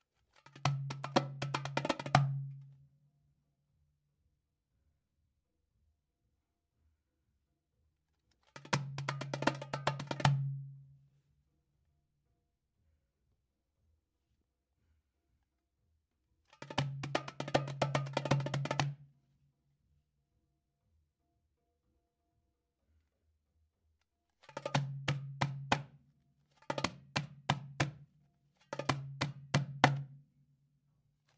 Darbuka Drum Percussion
Just a little recording of my plastic darbuka with a metal body